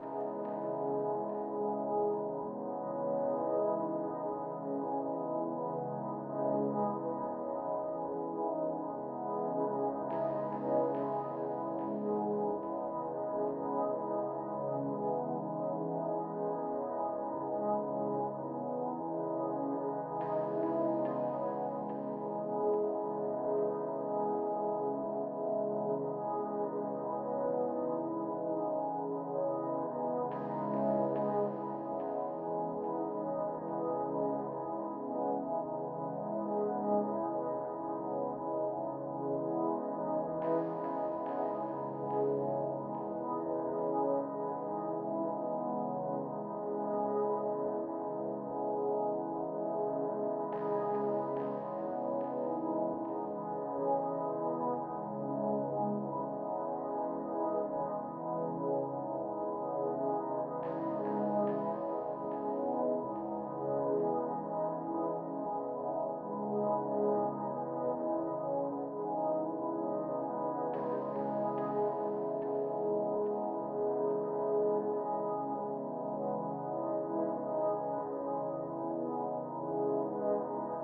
sad pad looping (consolidated)
sad warm pad